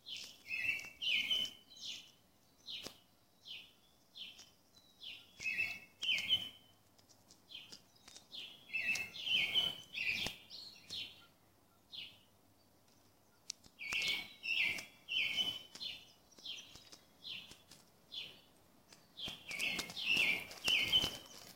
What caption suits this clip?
Recorded in Winnipeg, Canada at 5 am